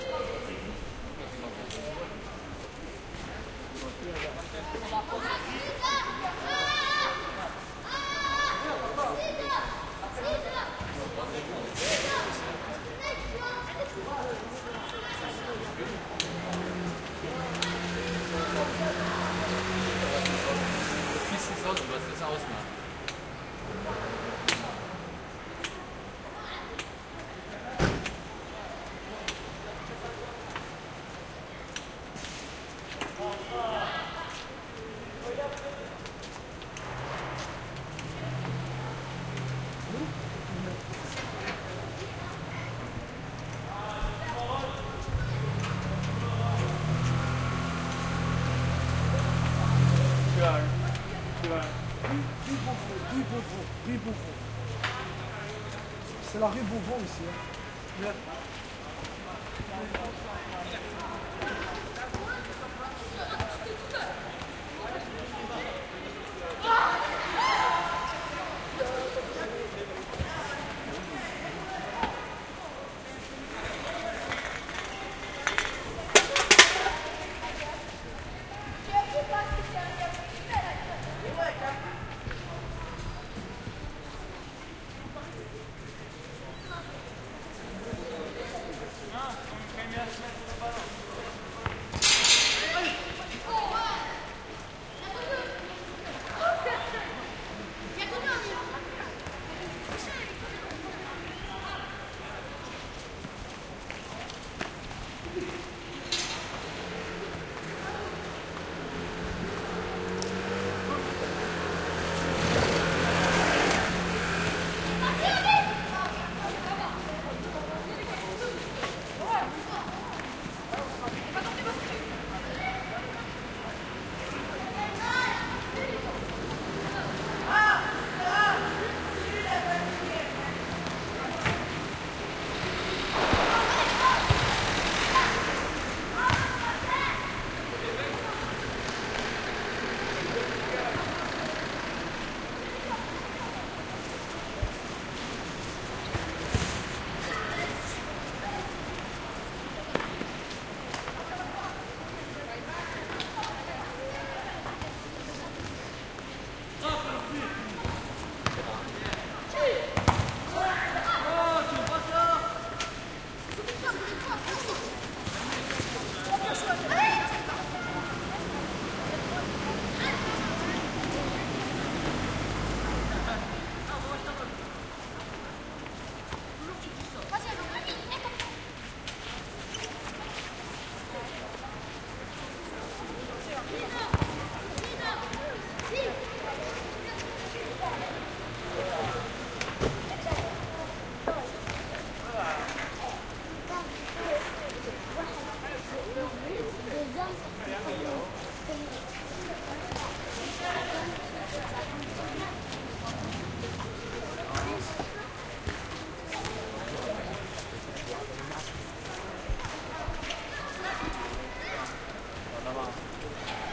kids playing soccer in front of opera or courtyard +distant traffic Marseille, France2 MS
playing, kids